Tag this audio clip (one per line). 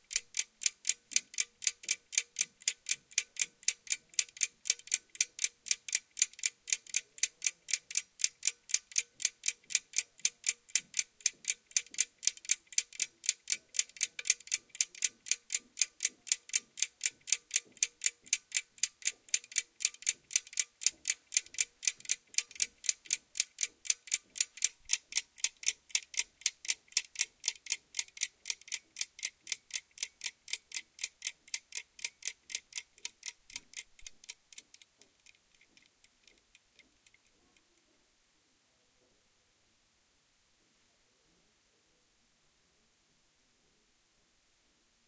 clock
machine
tick-tock
tic-tac
time